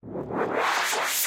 Short sound effects made with Minikorg 700s + Kenton MIDI to CV converter.
Minikorg-700s, Korg, FX